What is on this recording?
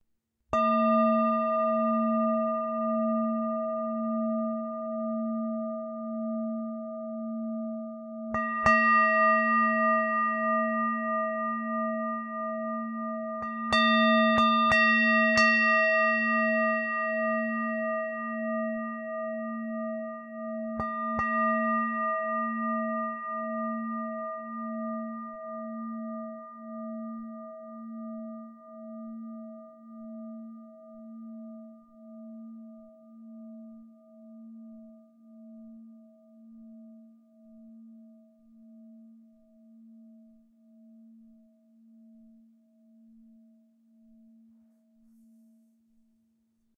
Himalayan Singing Bowl #122
Sound sample of antique singing bowl from Nepal in my collection, played and recorded by myself. Processing done in Audacity; mic is Zoom H4N.
ding, brass, clang, drone, tibetan, harmonic, bell, metallic, singing-bowl, strike, chime, bronze, ring, ting, meditation